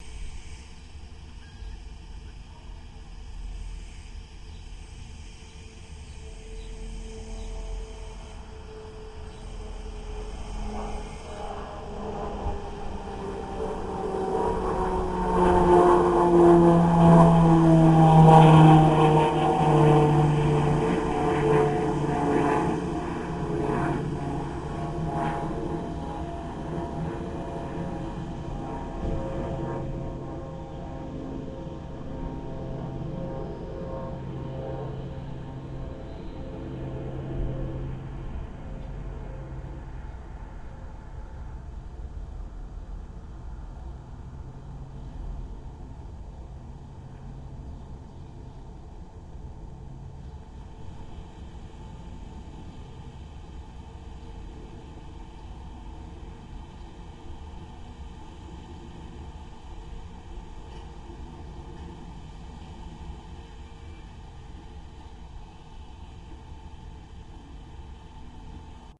just one airplane passing by... enjoy